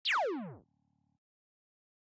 Lazer sound
I made this lazer sound with the use of operator in ableton as well as adding a few effects to help round it out. I started with a basic sine wave and then began to adjust the frequency range to cut out some of the low end of the sound and enhance the high end to make it more realistic and movie accurate. I also had to shorten the decay time so it is more of a short zap sound than a prolonged note. Another step was giving it an initial high pitched sound and this was achieved by having the sound start higher up the scale with semitones and then dropping down giving the effect of shooting and something traveling from the source sound.
design, effect, operator, sound